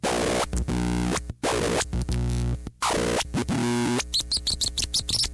A basic glitch rhythm/melody from a circuit bent tape recorder.
melody, glitch